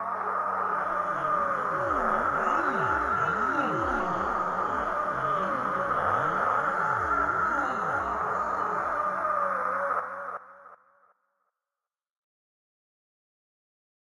A flexible sound effect that I created on Ableton for a time travel, you can use it anywhere!